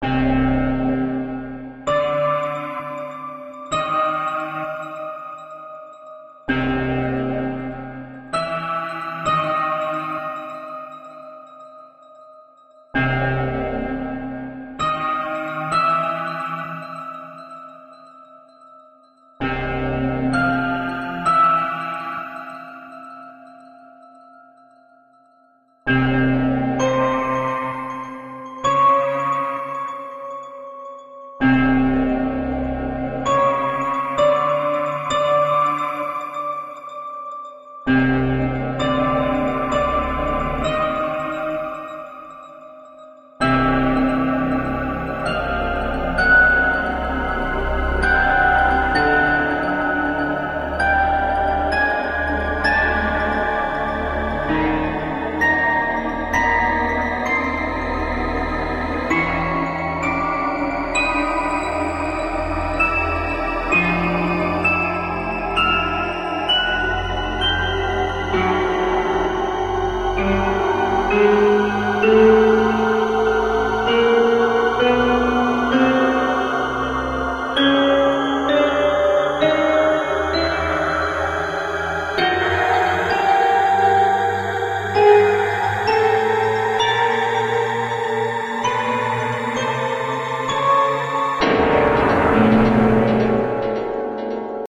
Suspense, Tension, Crescente
Som gravado no F.L Studio para cenas de suspense crescente.